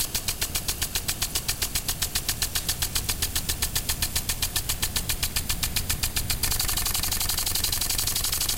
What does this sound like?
A recording of a lawn sprinkler that I edited to be in 4/4 time.